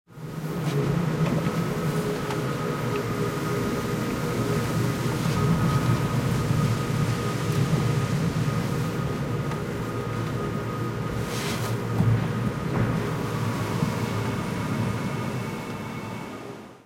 MITSUBISHI IMIEV electric car DRIVE windows open in parking garage
electric car DRIVE windows open in parking garage
car, garage